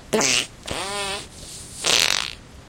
fart poot gas